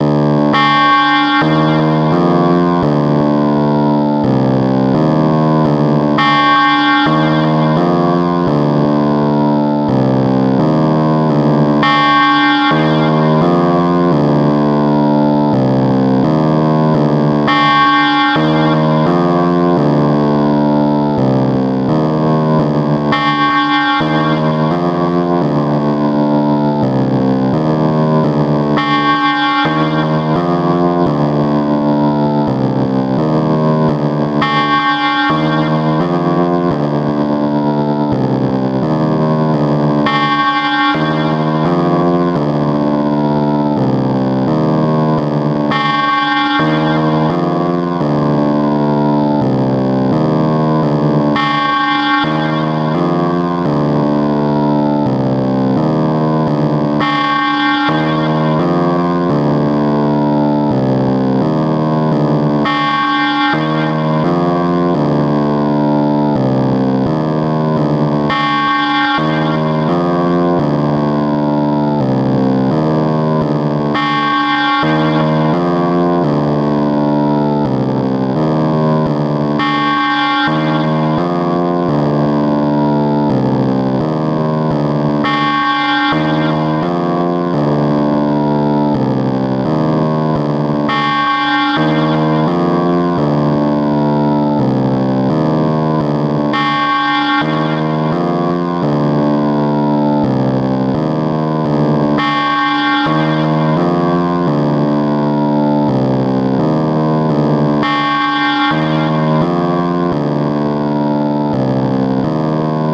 Industrial sound efect
Just used the LFO and the pan. Annoying and dark. Use it and leave me a reply. Thanks